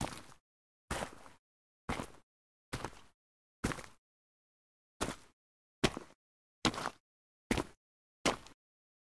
Footsteps on Gritty Ground (stones and pebbles) - Mountain Boots - Walk (x5) // Run (x5)
Gear : Tascam DR-05